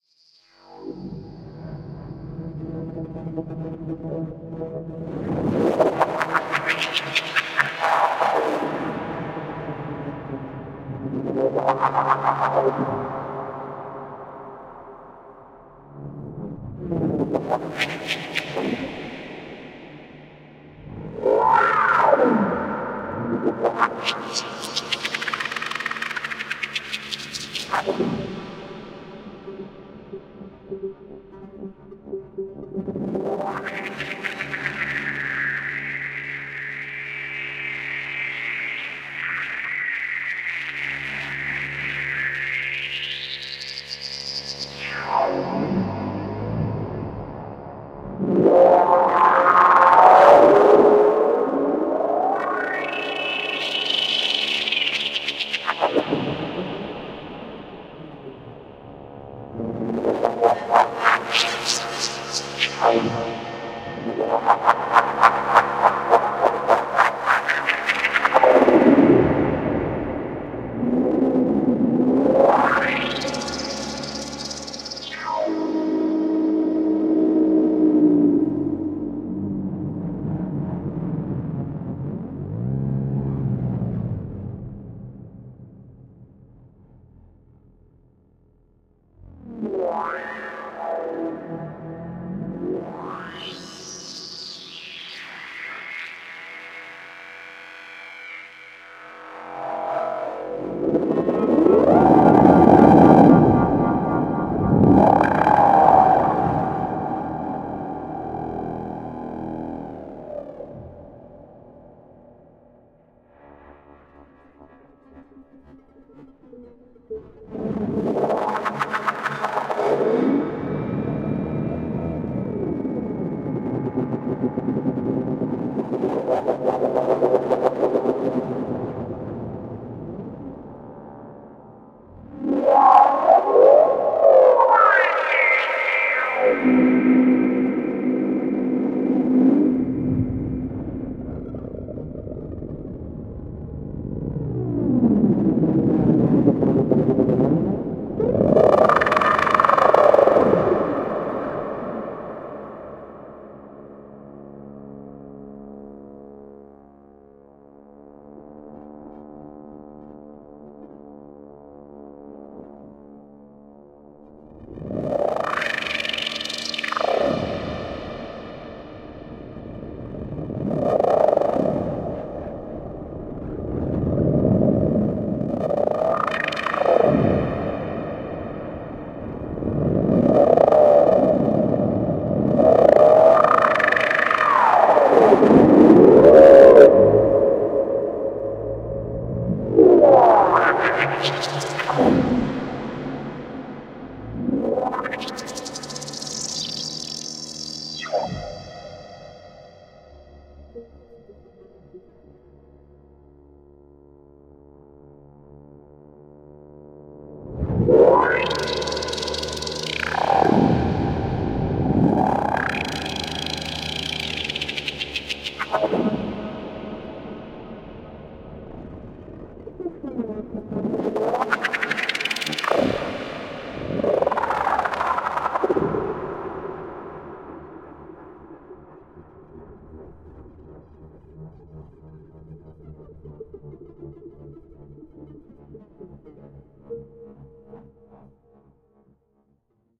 ESERBEZE Granular scape 03
This sample is part of the "ESERBEZE Granular scape pack 1" sample pack. 4 minutes of weird granular space ambiance.
space, reaktor, electronic, drone, granular, effect, soundscape